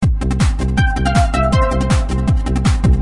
Fusion loop 1
techno, beat, drumloop, trance, loop, drum